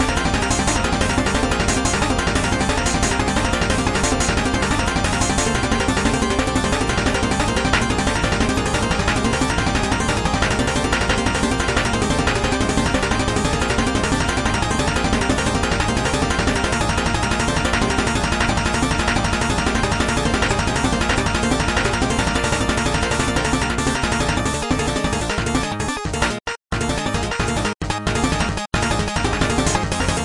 8Bit Orgasmic Kit 2
8bit, arp, synth